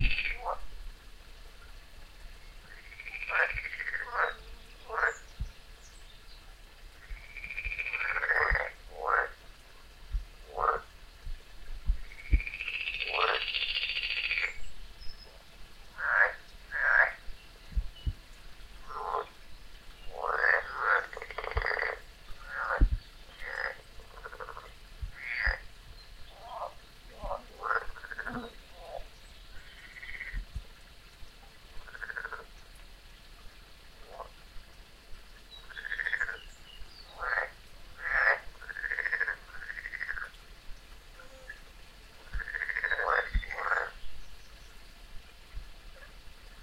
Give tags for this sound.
toad; croak; frog; frogs; toads; croaking; pond

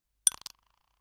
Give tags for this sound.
aspiring; empty; glass; tablet

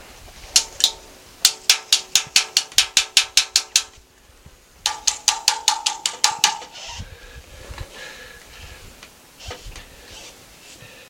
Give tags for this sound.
hits; madagascar; rock

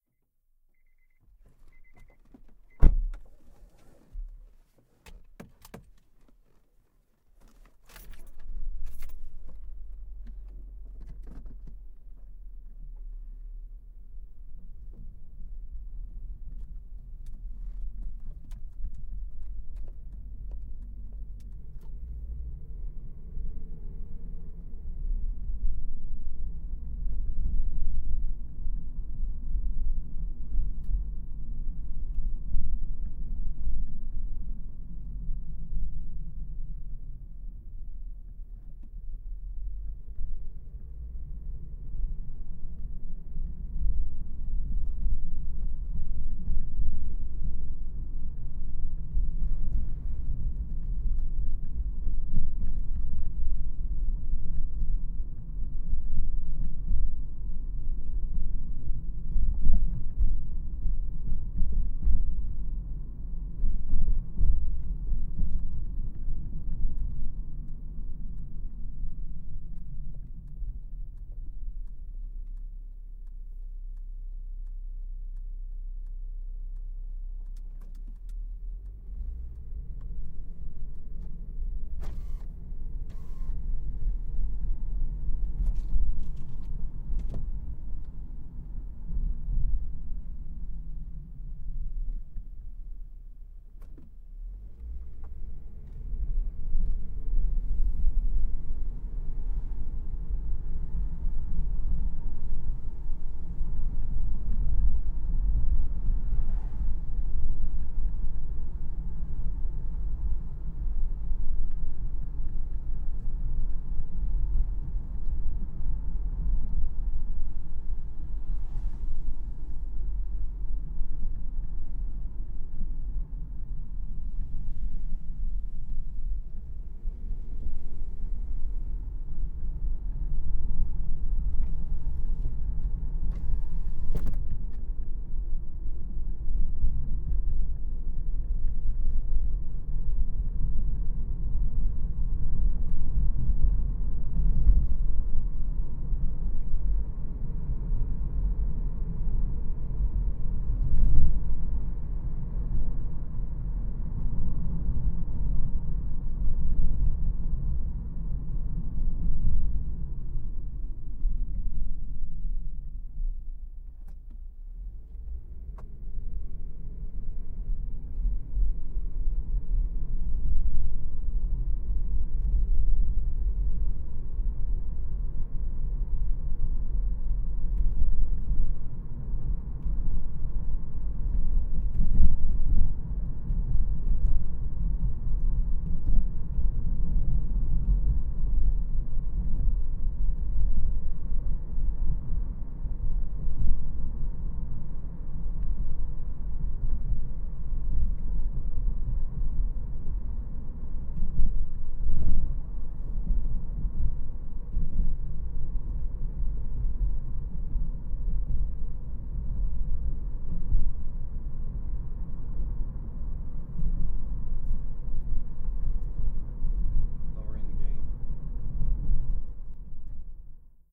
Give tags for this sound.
pavement; driving; gravel; car; ambisonic; WXYZ; interior

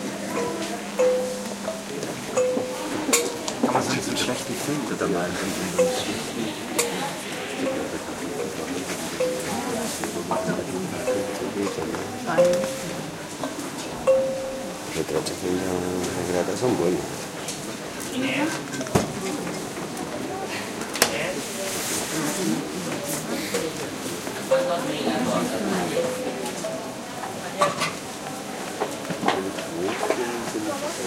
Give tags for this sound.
shop; kalimba; voice; ambiance; field-recording

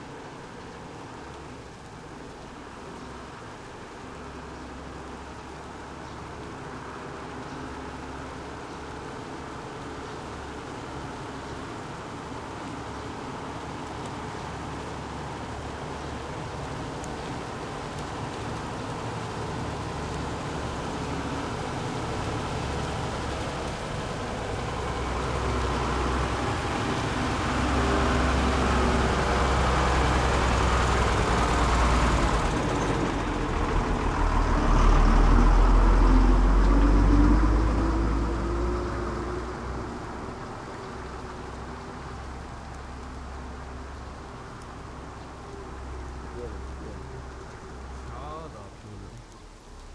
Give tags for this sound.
high; rain; speed; truck

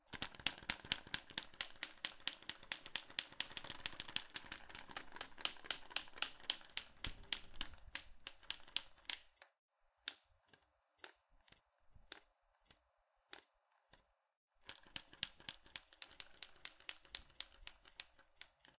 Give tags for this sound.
impact; metal; OWI

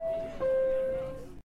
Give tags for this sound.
closing; ding; dong; door; nyc; subway; train; voices

